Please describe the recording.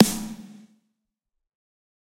Snare Of God Drier 012
drum, drumset, kit, pack, realistic, set, snare